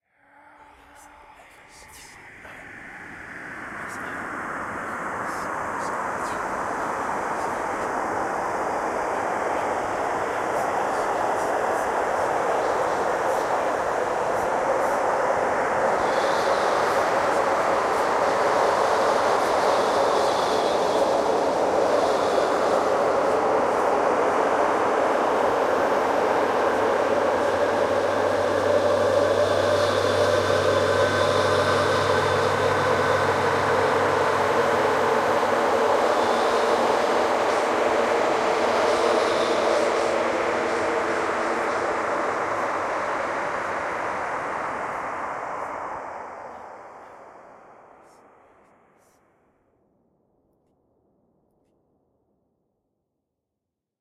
Compilation of (processed) whispers, breaths and synths to obtain short audio-fragments for scene with suspense in a flash-based app with shadows.